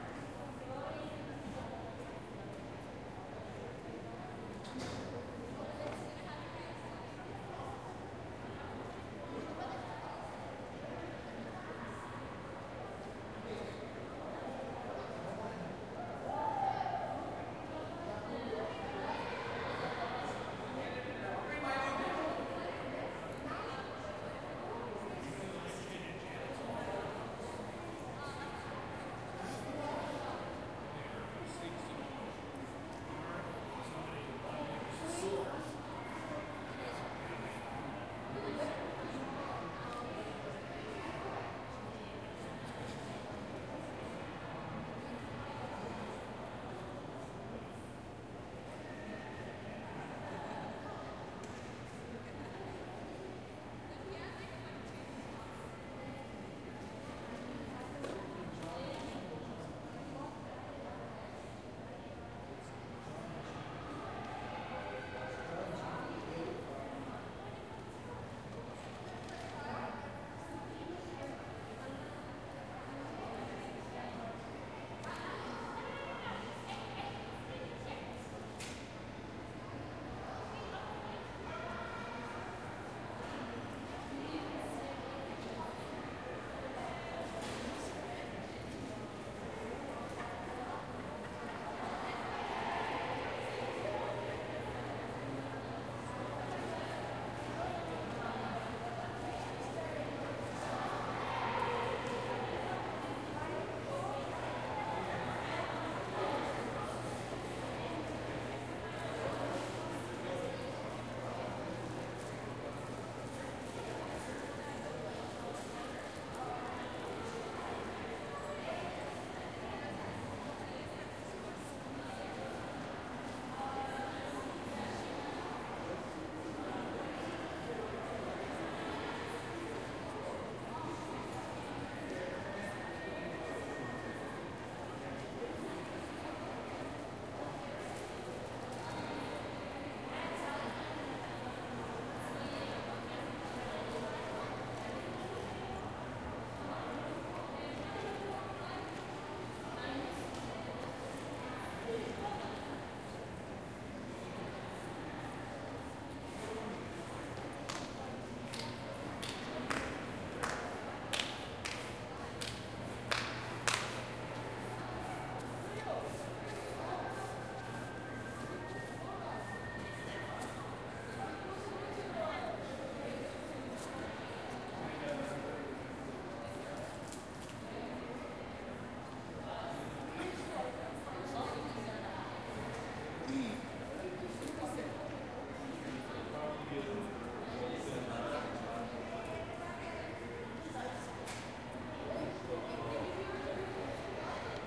Samuel Hall Currelly Gallery - ROM Toronto Canada
Atmosphere recorded at the Royal Ontario Museum, Toronto, Canada, in the Samuel Hall Currelly Gallery.
44.1 k, 16 bit
Recorded on 23 April 2014
atmosphere, museum, soundscape